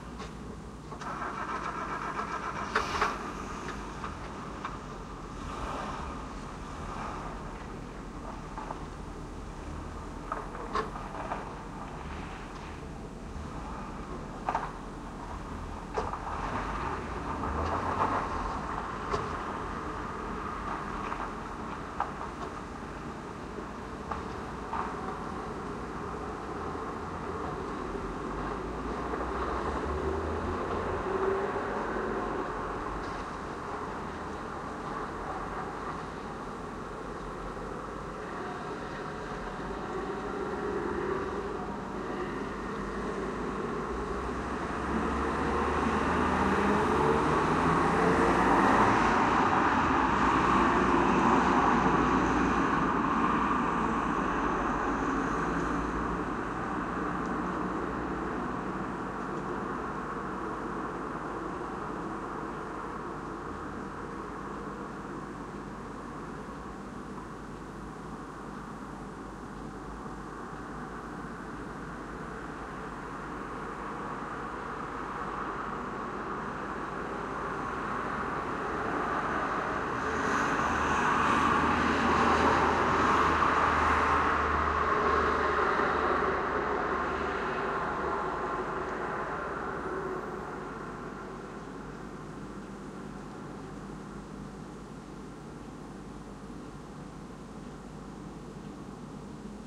night atmo
atmo, night